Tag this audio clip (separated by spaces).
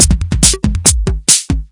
beats industrial noise precussion rough